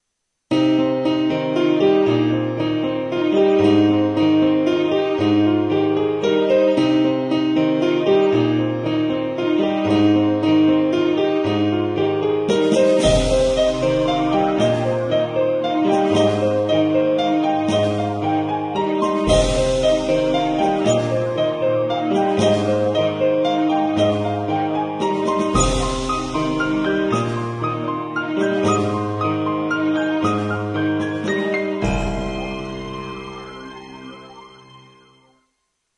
Tema RPC Curitiba Em Movimento2012
This is a composition about "Curitiba em movimento" or Curitiba´s Movement. It´s about the city routine and how it citizens see the city moving.